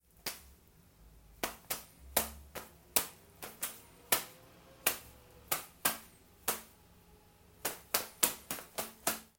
foley for my final assignment, a little fight between two little guys